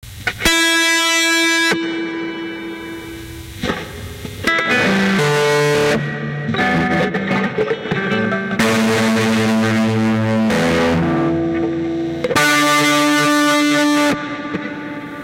test electure
Testing the DS-40 in various USB class settings to determine if the unit can work as a cheap USB interface. Various settings of mic sensitivity and USB setting.Electric guitar direct from processor thru unit via USB to laptop. Using lecture mode microphone sensitivity setting.
guitar, test, usb